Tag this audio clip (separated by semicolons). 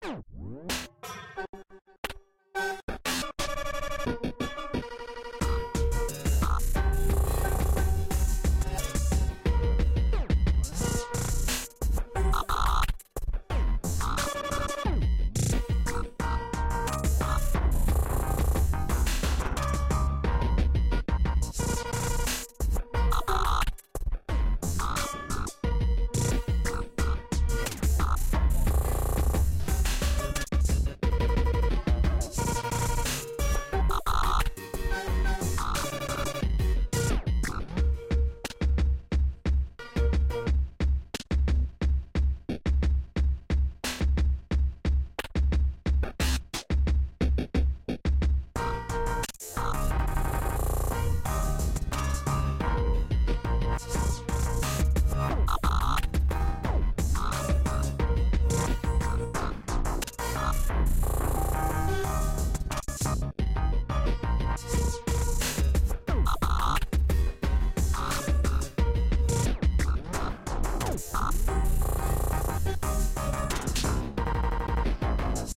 dbglitch; fruity; loops; samples; vst